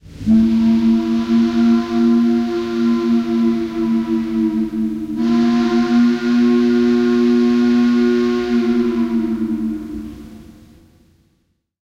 Dartmouth Noon Whistle
This a recording that I made in 1984 of the Noon Whistle at Dartmouth College, Hanover, NH. It was originally recorded to audio cassette using a JVC Boom Box (with built-in microphones).
dartmouth-college, ambient, field-recording, steam-whistle